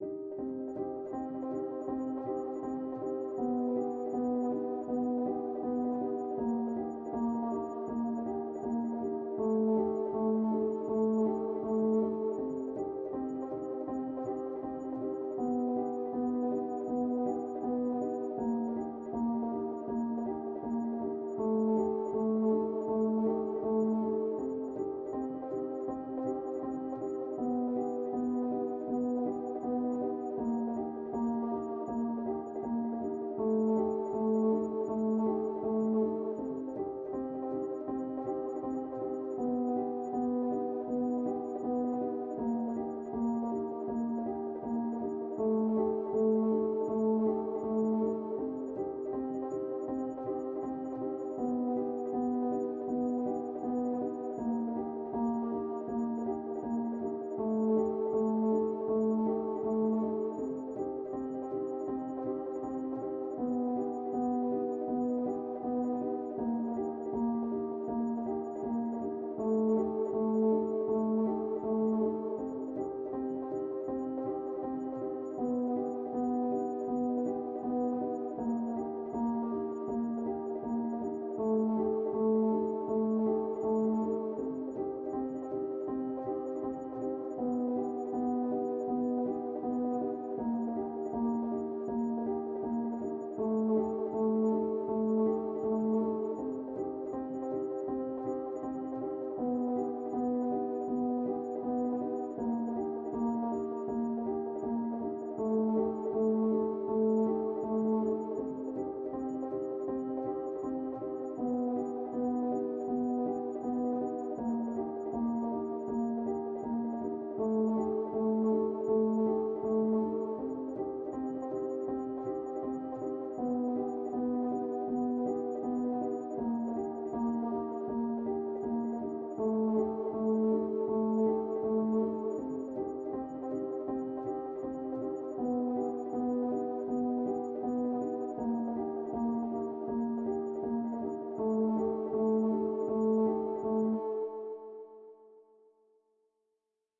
Dark loops 000 piano efect 80 bpm
loops, piano, loop, 80, bass, dark, bpm, 80bpm